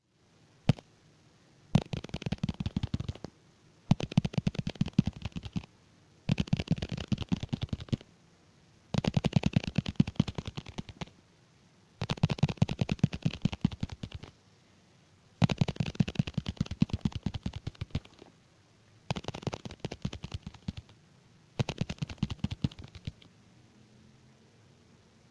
pasoso cucaracha
sound, funny